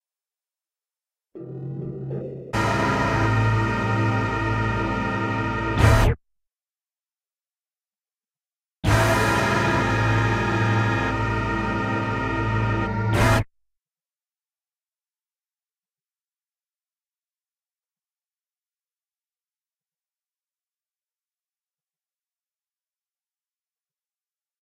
A 'stab' created on A MIDI guitar setup. The chord is A7+9.